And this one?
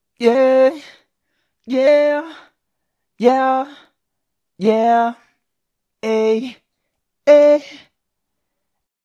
Male Autotune F major yeah ey